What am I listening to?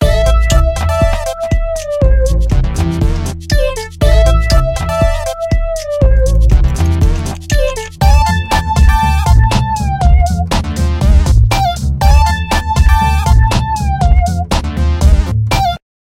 Fun music created with Soundtrack Pro
Music created with Soundtrack Pro. Do whatever you want with it!